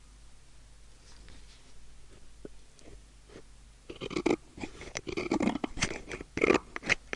Edgar Scissorhand cuts a emballage paper used for making people frustrate.
hand, crafts, office, tools, home